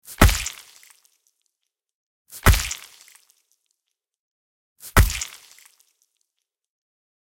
The effect of a crushing hit to the head.
I ask you, if possible, to help this wonderful site (not me) stay afloat and develop further.